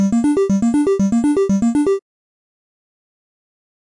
8 bit arpeggio 001 minor 120 bpm triangle 020 G2
atari, mario, gameboy, 8-bit, gamemusic, drum, 8, gameloop, 8bit, bit, 8-bits, electronic, bpm, 120, nintendo, loop, free, loops, beat, game, bass, music, synth, electro, sega